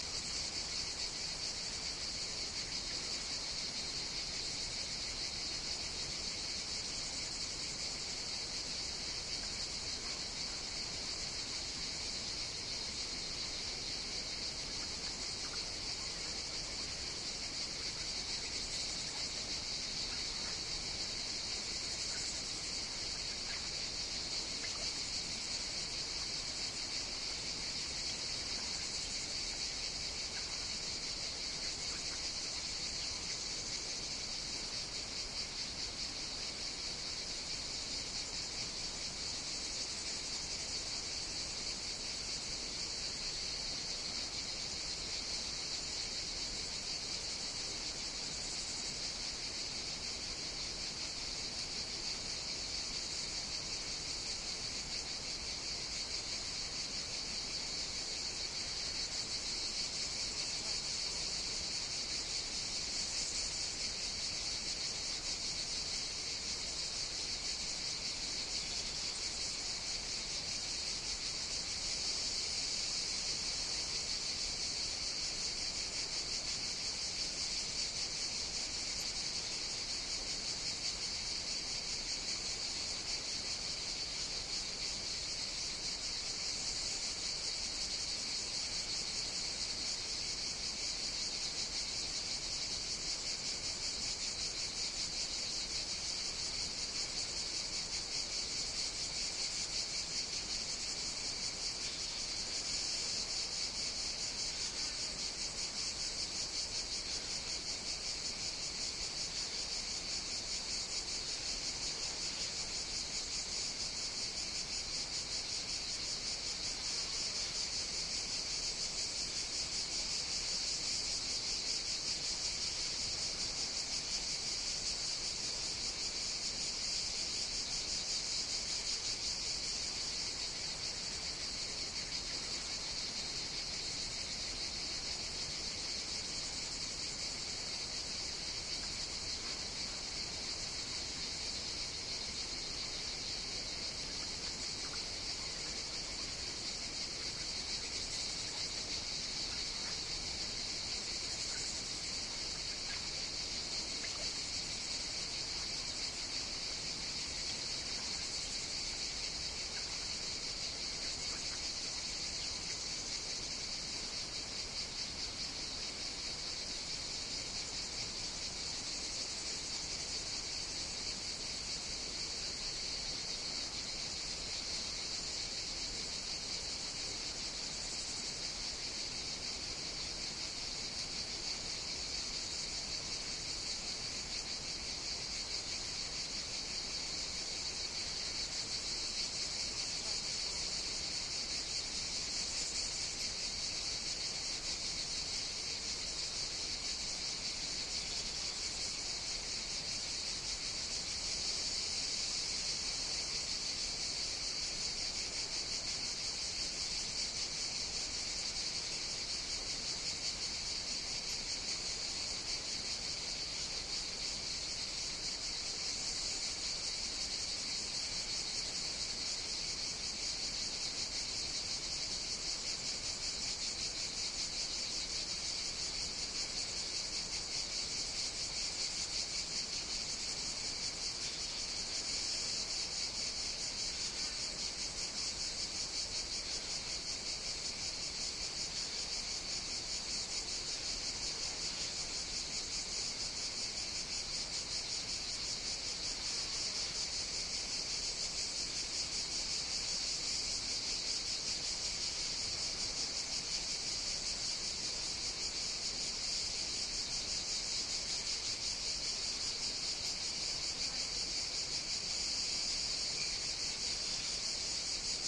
Cicadas (Euboea, Greece)
Cicadas, Field, Greece, Insects